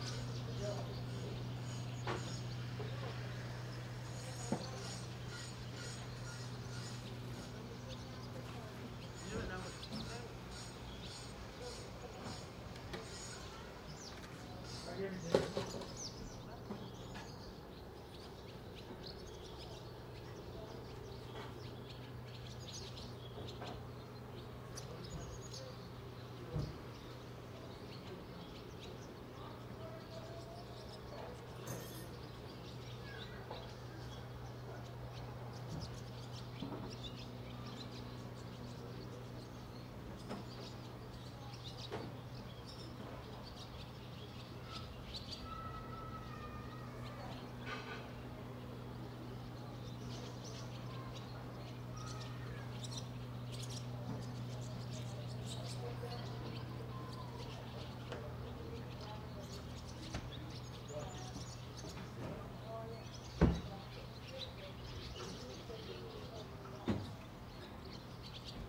mono-atmo-smkh60
mono fisherman village
fisherman, village, mono